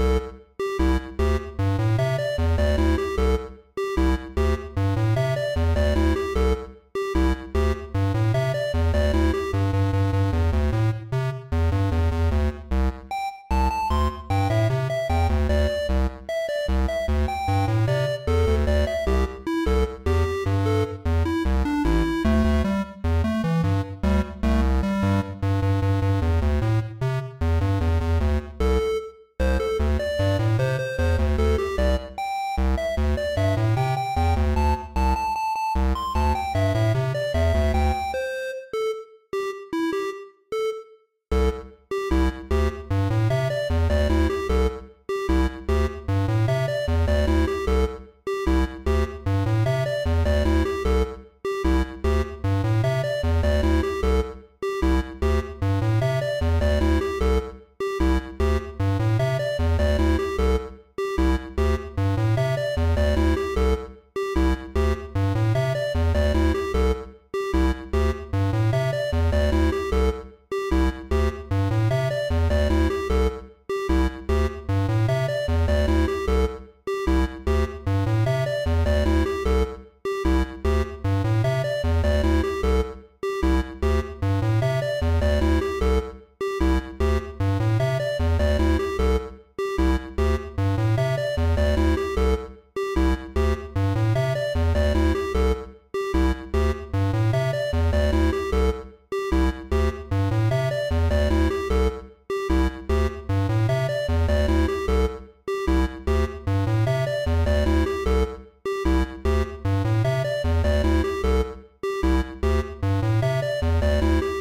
Sequence - 8 bit music loop
A short 8 bit music loop.
8bit, loop, music